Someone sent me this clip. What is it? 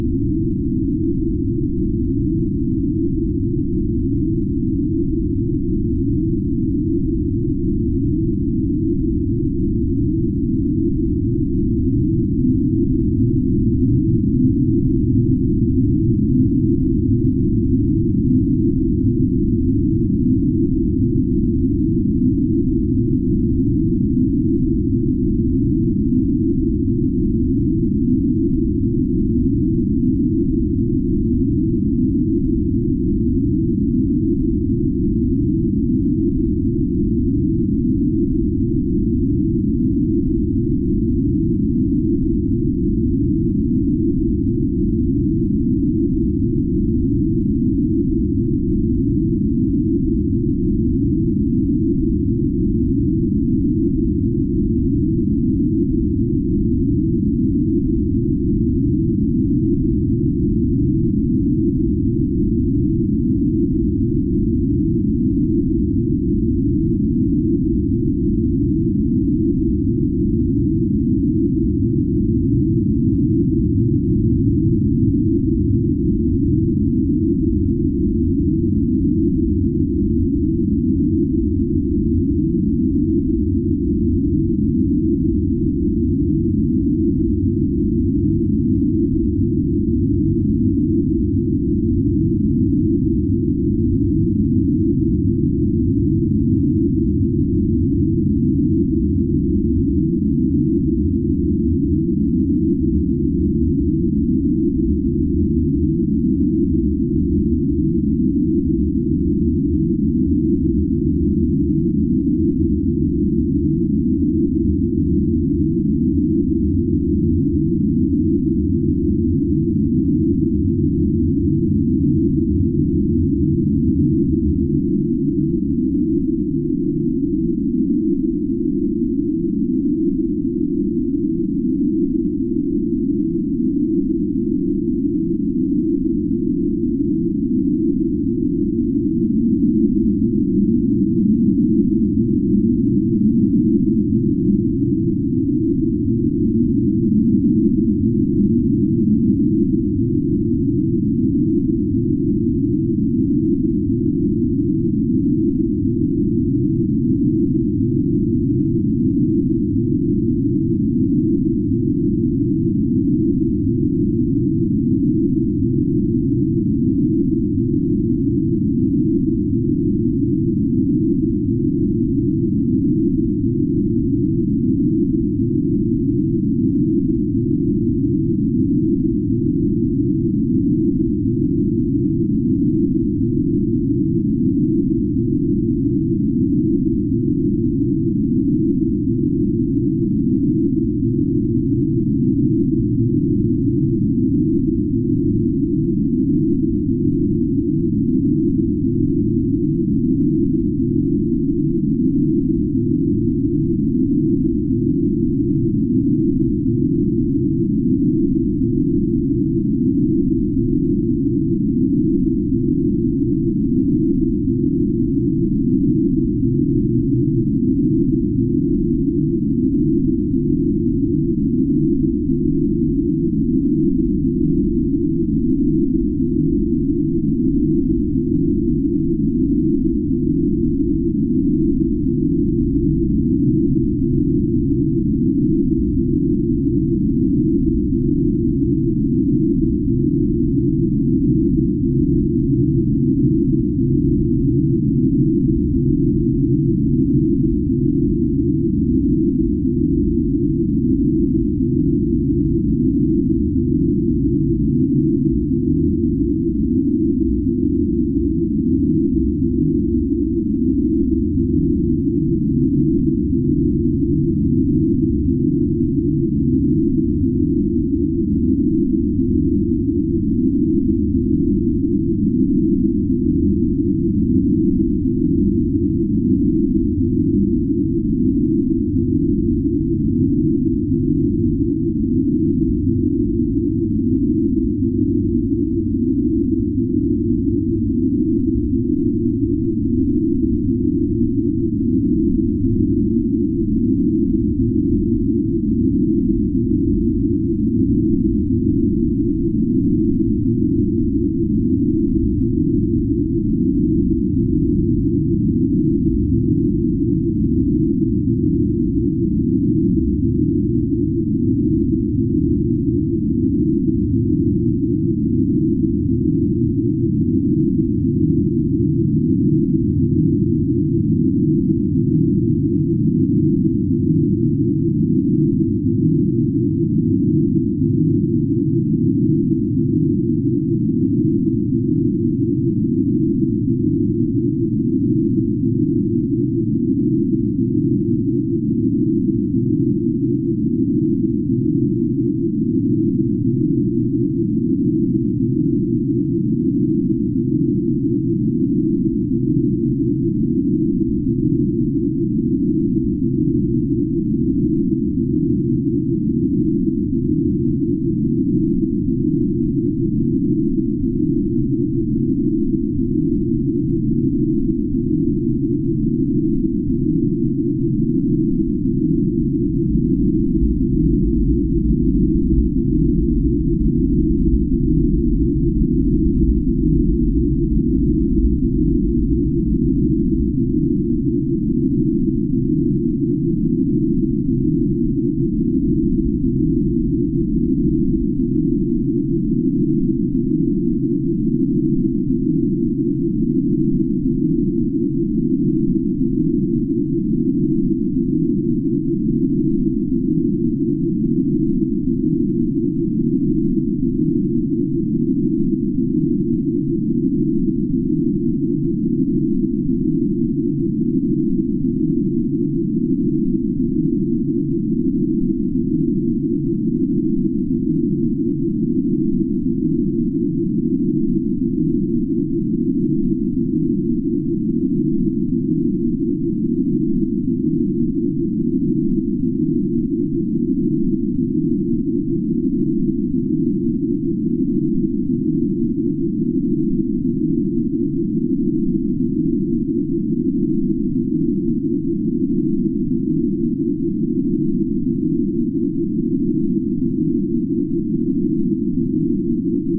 cityscape drone
I took an image of a cityscape and ran it through AudioPaint 3.0, streched how long it was and adjusted the highest frequency down to 500hZ or something. Then we get this deep drone-like noise